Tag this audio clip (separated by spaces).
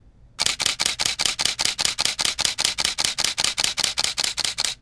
650d apogee apogee-mic canon mic rapid reference shutter t4i